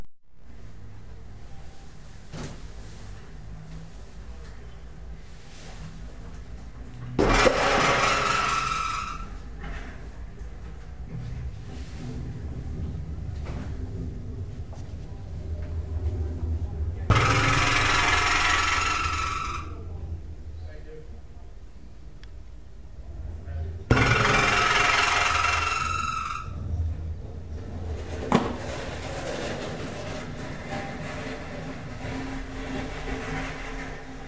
3 strikes with shoe on radiator in our bathroom at work.